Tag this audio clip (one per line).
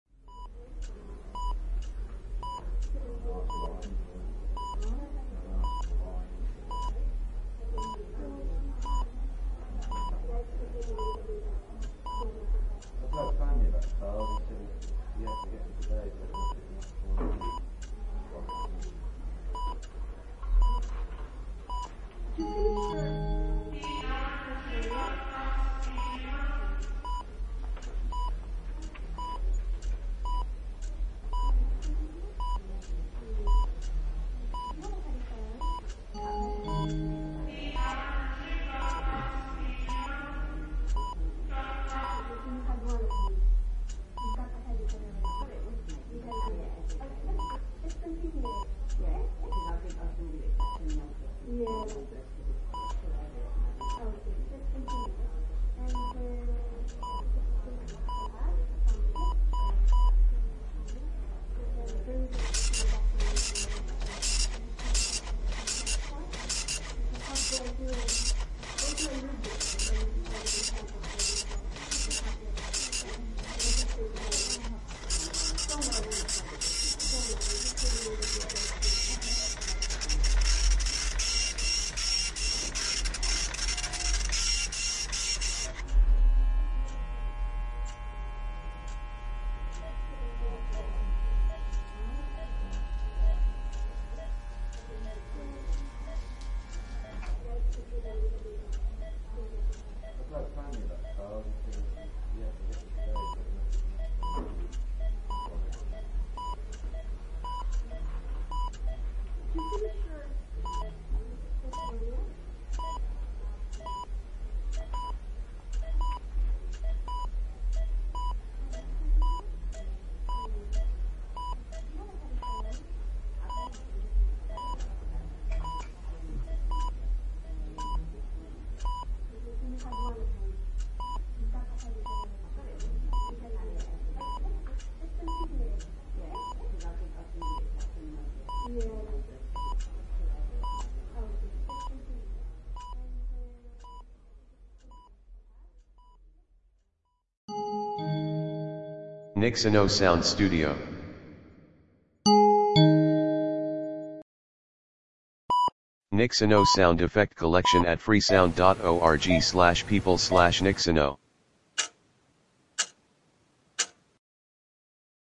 atmo
background-sound
fx
ambiance
atmos
general-noise
ambience
atmosphere
beep
paging
heart
atmospheric
room
tik-tak
background
tic-tac
white-noise
Hospital
clock
ambient
amb